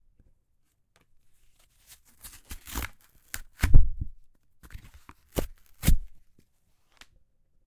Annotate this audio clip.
Ripping Cardboard
cardboard, packaging, rip